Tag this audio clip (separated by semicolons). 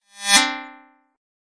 Rise; Guitar; Pluck; Fake